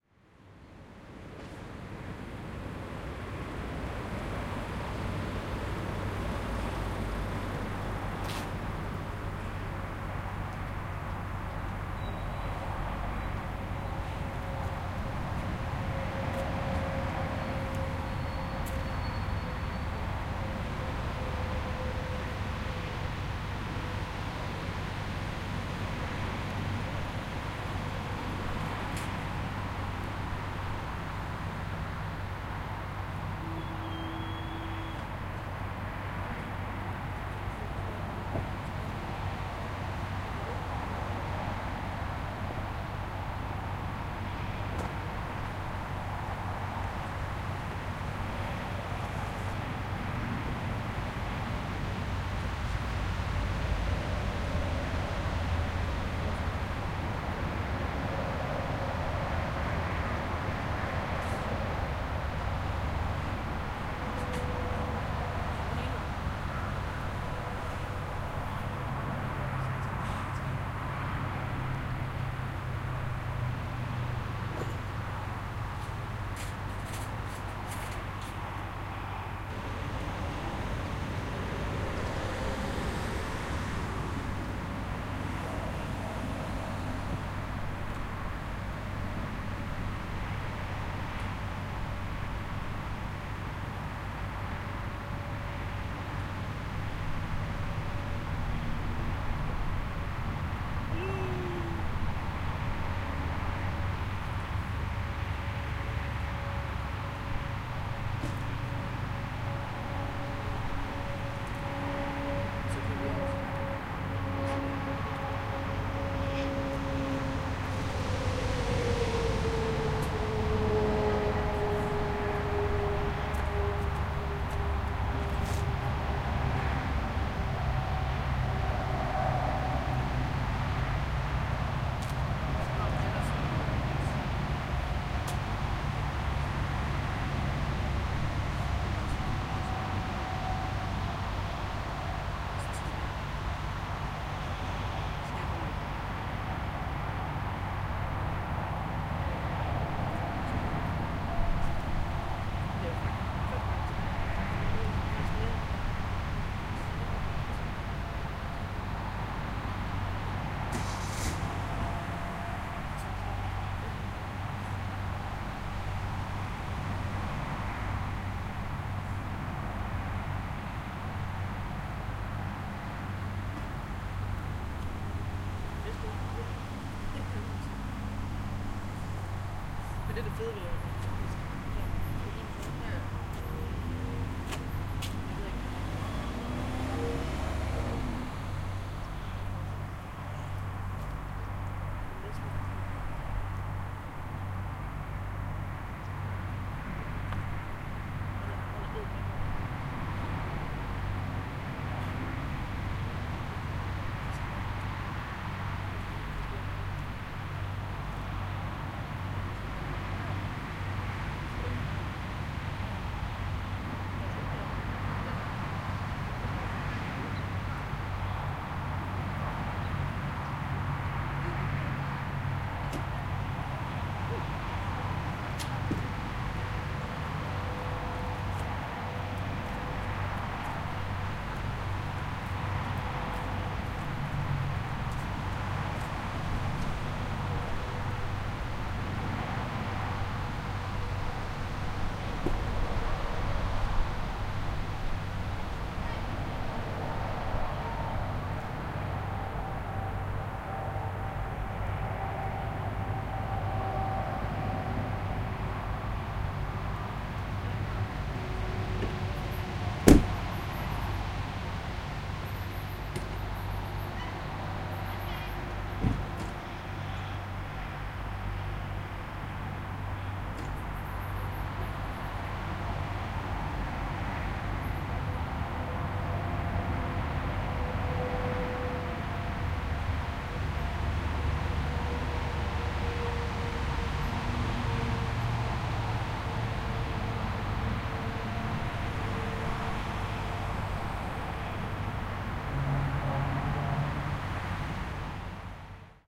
110802-truck park on the kolding way
02.08.11: third day of my research project about truck drivers culture. about 18.00 p.m. several dozens km from Kolding in Denmark. car/truck parking. sound of passing by cars, parking cars, people voices. noise and drones.
field-recording, people, truck, denmark, cars, trucks, traffic, bang, kolding, car-park, crack, noise, steps, drone, voices, motorway